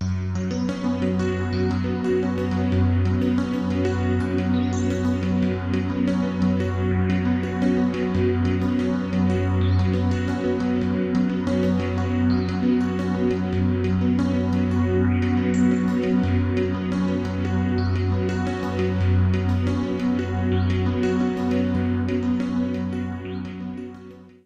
A soft F# suspended (4) arpeggio ideal for lounge, ambient or similar kinds of music or special moments in films.
The arpeggio was played by me, but the sound is actually a preset from the Kurzweil SP4-7 with slight modifications.
Recorded with Sony Sound Forge Audio 10 using the Focusrite Scarlett 2i2.
Loop it as you need/want.